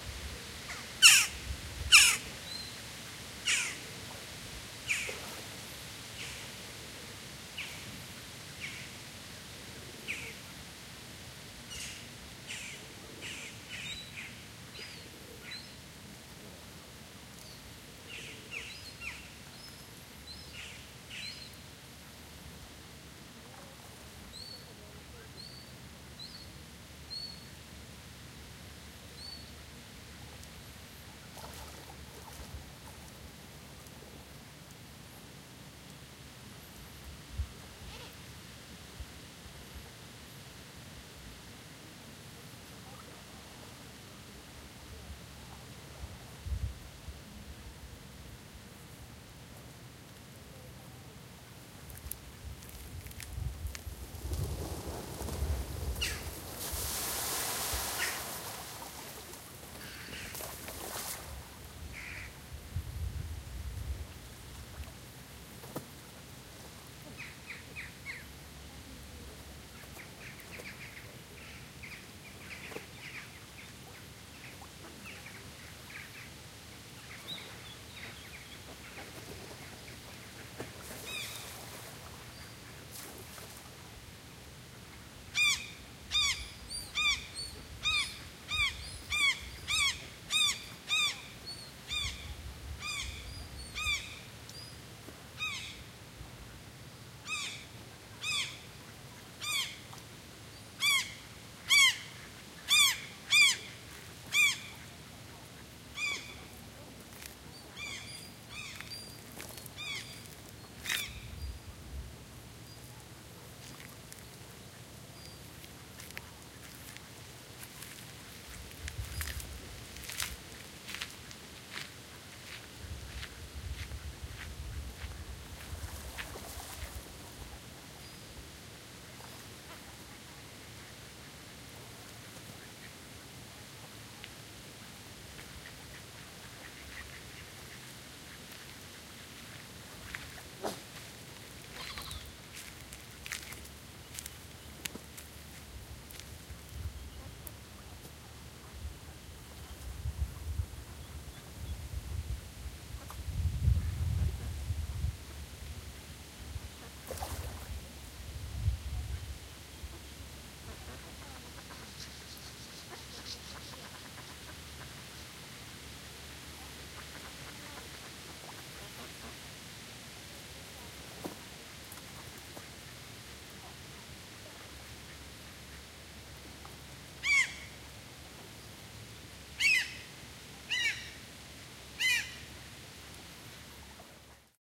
Different birds, birds swimming, wind, footsteps
Birds flying by and swimming in a pond nearby, wind, footsteps.
footsteps, birds, nature, field-recording, wind, water